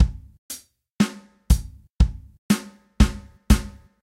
A generic pop/rock drum loop created using a free MIDI software.